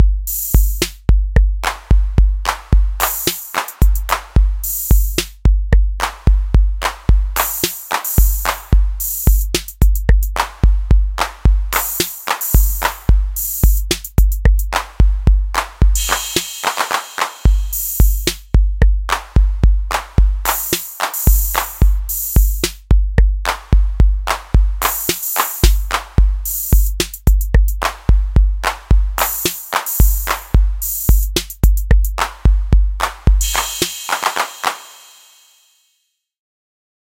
Description: A hyphy "gritty & pounding" rythm.
BPM : 110 (same as Crunk)
Type : Rythm
Made for fun.
I'm not entirely sure this is hyphy.